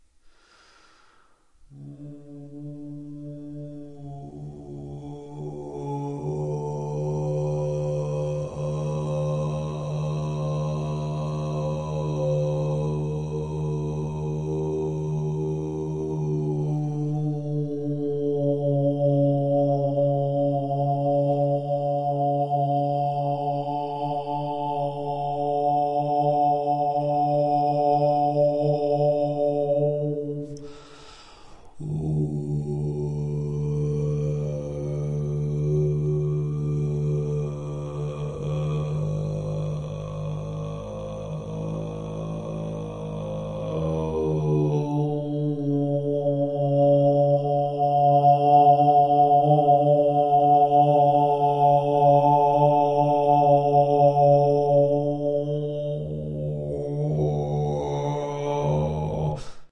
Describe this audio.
I tried to reach my lowest and highest notes by throat chant techniques.
chant, human, male, overtone, throat, undertone, vocal, voice